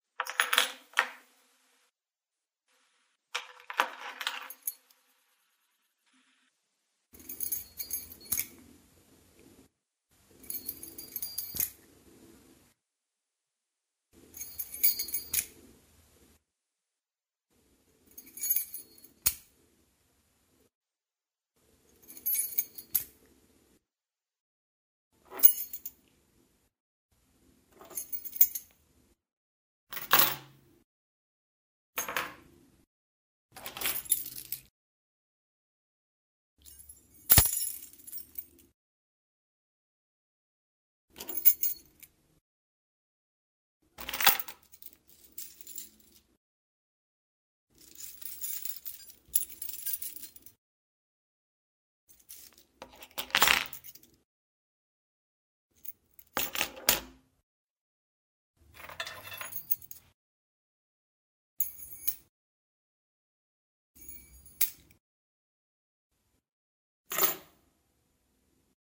Metallic Keys
noise, metallic